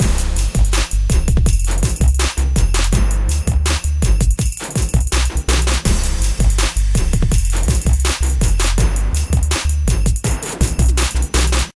TheLeak Hiphop DrumLoop
4 bars Dirty South/Trap music drum
Bpm 82
dirty, drumbeat, drums, hip, hop, rap